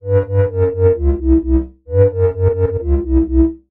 High Resonance Bass Pattern 1
bass, ni-massive, bass-synthesis, sub, funky, 130bpm, dark-bass, bassline, wobble